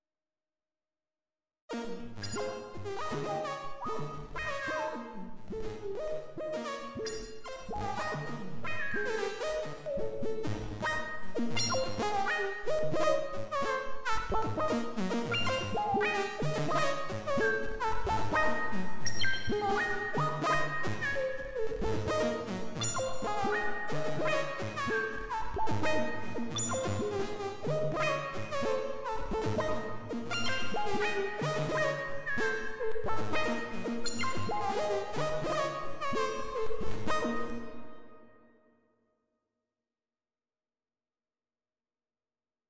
pure data improvisations (6)
estudos e divertimentos diversos ao pd.
electronic, fora-temer, free-improvisation, glitch, long-shot, noise, pure-data, soundscape, synth